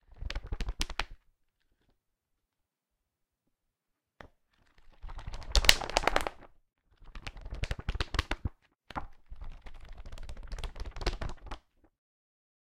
Book Pages flipping 1
Pages being flipped quickly. The book was big.
Book, flip, page, Pages, paper, Turn